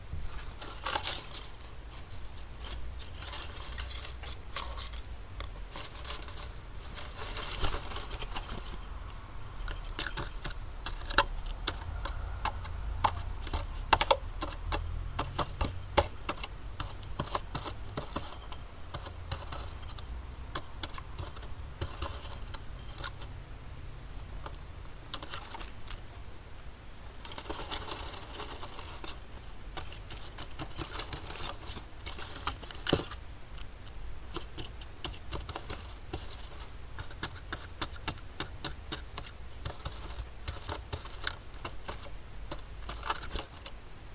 starling bird moving in a cave 01
Sounds of a starling that has moved in our ventage :D
starling, knocking, bird